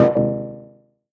Short discrete error sounds, could be used for game sounds.
bleep, blip, button, click, clicks, error, event, fail, game, glitch, menu, mistake, sfx, wrong